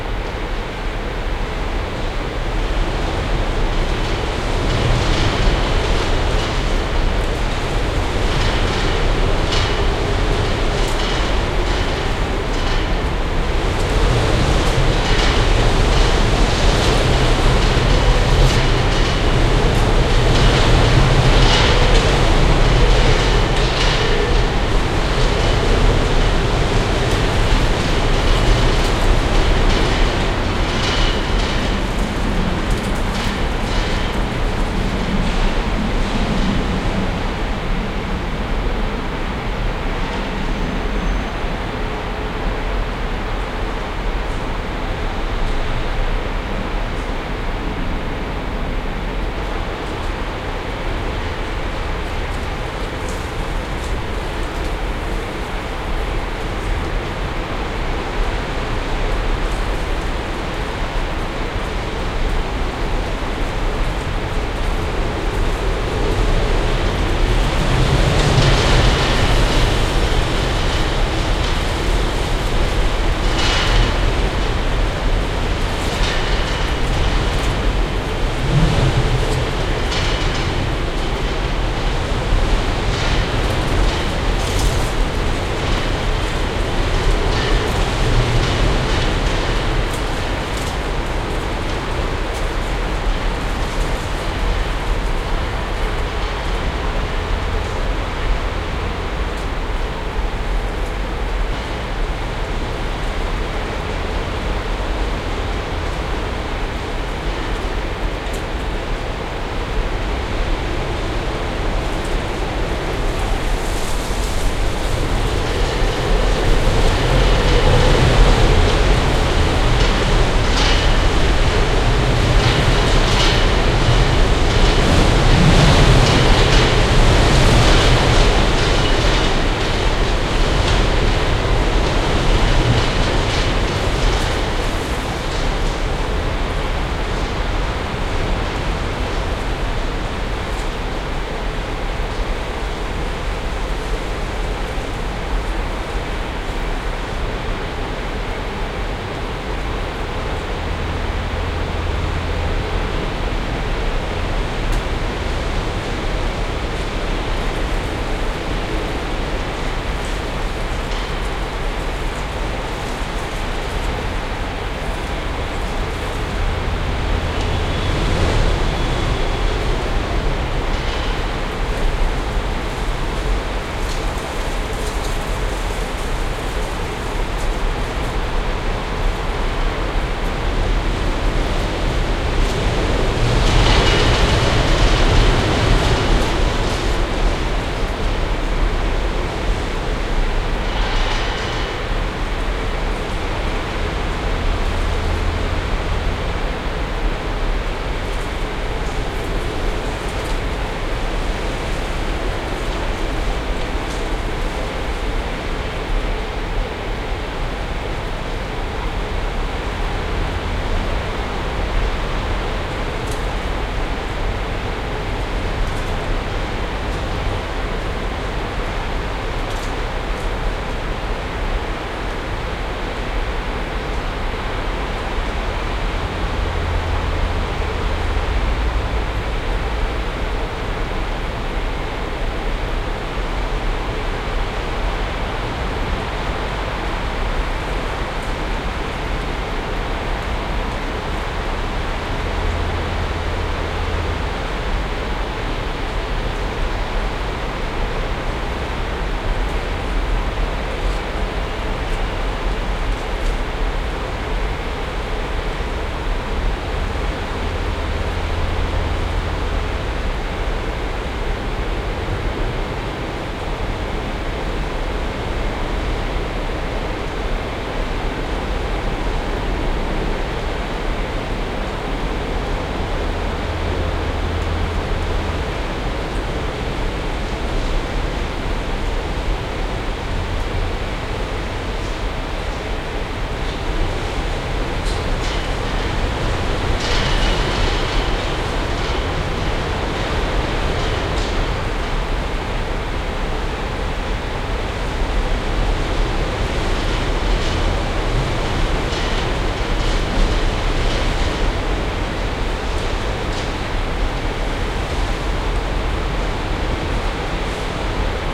A storm passes over the city on this winter night. Wind gusts in the building enclosure. Recorded with a Pearl MSH 10 microphone via SD 302 field mixer to Nagra Ares-PII+ recorder. Middle and side recording matrixed to AB stereo at the mixer stage. Some EQ and limiting applied.
weather, field-recording, city, outdoor, storm, wind